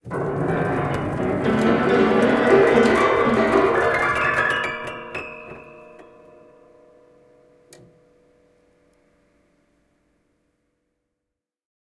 Detuned Piano Upwards 8
A whole bunch of broken piano sounds recorded with Zoom H4n